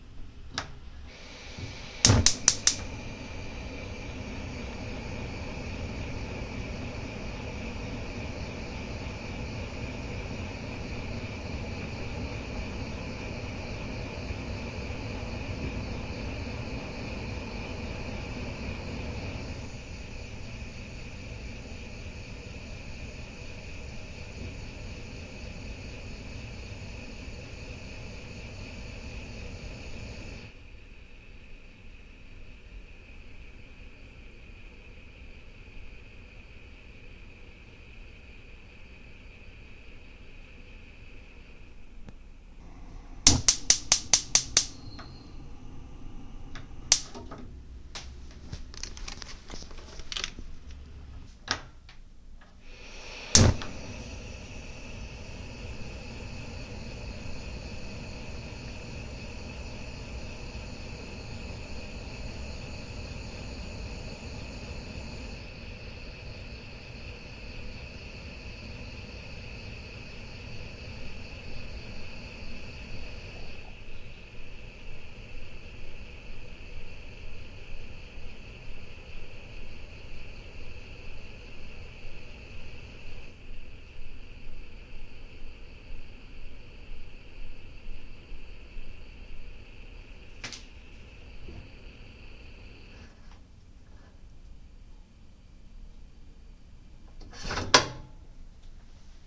Igniting the strongest gas burner on my range, recording the flames at various strengths